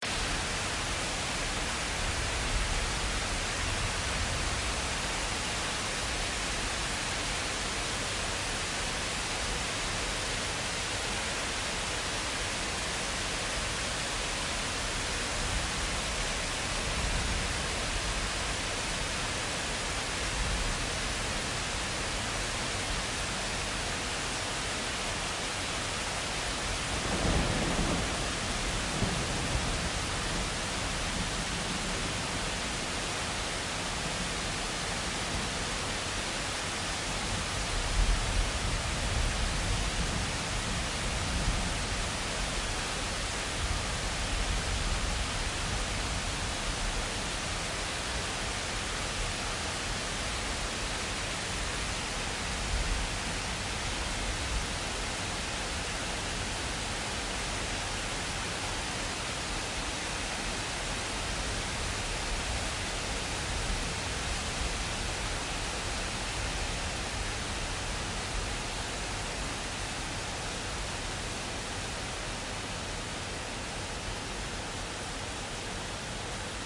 rain and thunder
Raining sound recorded during a hot autumn night from my apartment.
thunder, rain, nature